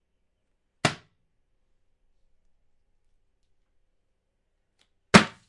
Book falling onto wooden floor. High impact loud sound. Recorded with Zoom H6 Stereo Microphone. Recorded with Nvidia High Definition Audio Drivers. The sound was post-processed to reduce clipping.
Book, Books